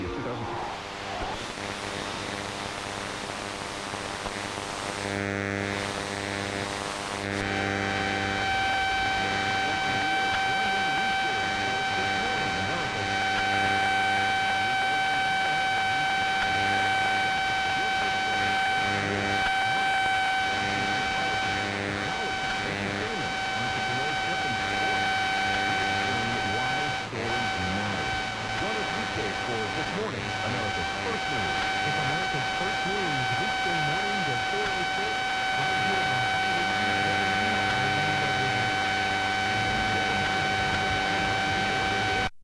AT&T Cordless Phone in charger with station AM Radio
Electro-magnetic interference from an AT&T; cordless phone handset CL82301 charging in the charger when held and moved around near the internal Ferrite antenna on the back right of a 13-year-old boombox. The radio was set to 580 KHZ WIBW from Topeka. You can hear the station in and out as the signal from the phone waxes and wanes as I move it around the back of the radio. Recorded with Goldwave from line-in. You hear the signal to the base unit which is a low pulsed buzz, as well as the processor noise as it's charging. It's a single tone as opposed to the shifting tones when it's on standby.
EMI, am-radio, radio-interference, tone, radio, glitch, cordless-phone, beep, wibw, human, interference, male, voice